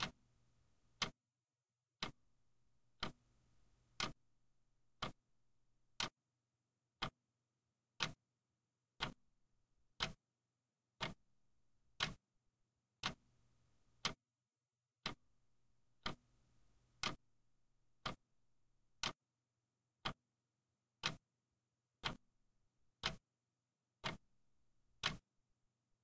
Clock/wall clock tic-tac recorded with Tascam dr-22wl. 44.1/16
dr-22wl, tic-tac, clock